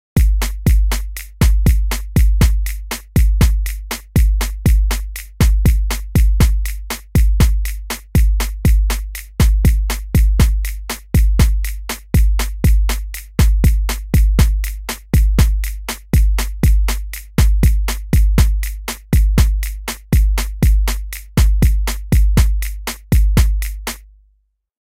Simple Hip Hop Beat Loop 1
A quick beat I made on FL Studio 11. You can use it for whatever commercial or not but just leave me some credit ;)